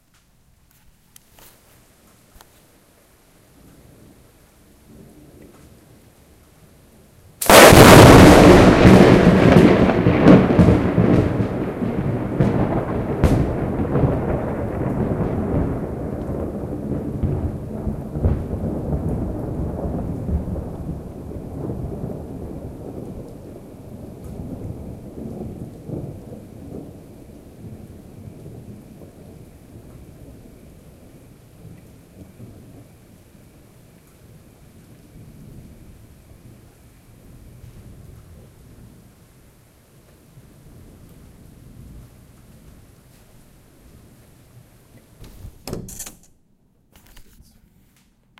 Thunderstorm lightning strike
WARNING VERY LOUD
Recorded around 1 pm in Stockholm, Sweden. After several days of unusually high temperatures (around 30 celsius) a thunderstorm rolled in without warning. After recording 10 minutes of standard thunderstorm sounds, I thought I'd give it a rest. But you know, just a few more minutes.
This is a raw recording. No editing or has been done whatsoever. It is loud and it is distorted.
Recorded on Roland R-05
bang, boom, close, crack, distortion, explosion, field-recording, lightning, loud, near, pointblank, r-05, roland, rumble, stockholm, storm, strike, thunder, thunderstorm, weather